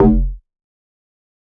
Donk Bass (F)
Older donk bass made by me.